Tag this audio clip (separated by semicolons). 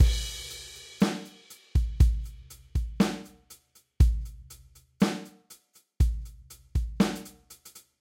drumloop; 120bpm; KORG; drums; korgGadget